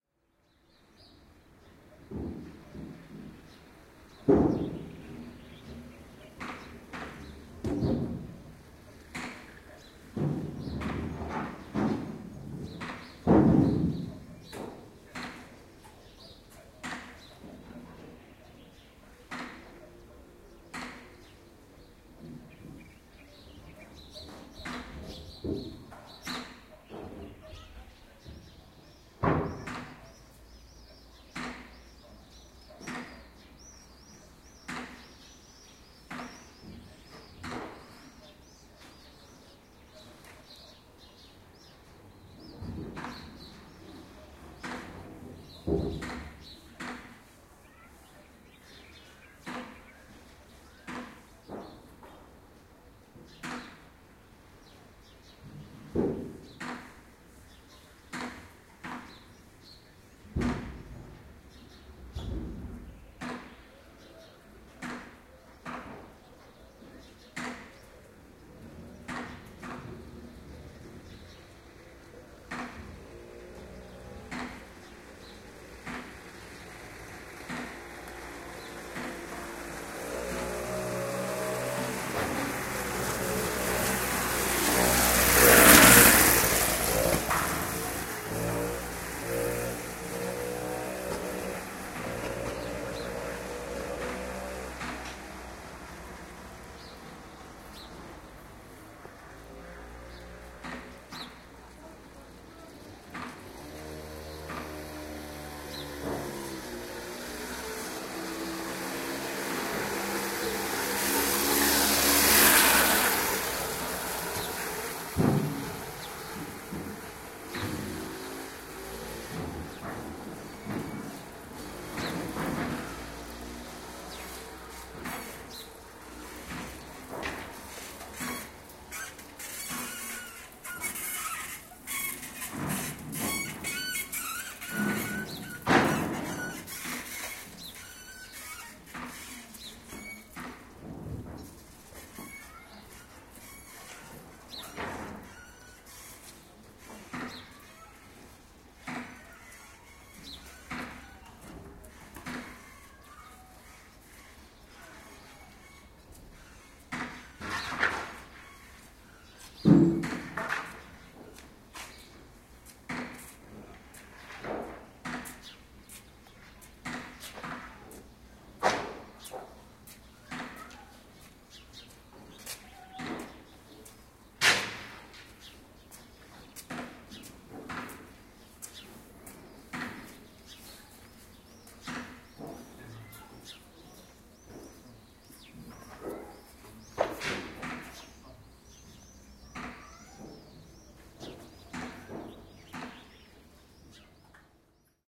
early morning passerby medina marrakesh
This recording was made in Medina, Marrakesh in February 2014.
Binaural Microphone recording.
Early, Marrakesh, Medina, morning, passerby